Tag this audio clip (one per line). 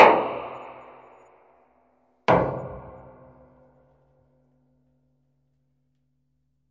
fuel
oil
Tank